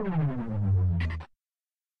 Power Down
A powering down sound effect made using Ableton's stock Operator and Analog synths
electronic, slow, shutdown, synth, power, power-down, down, synthesizer, shut-down, off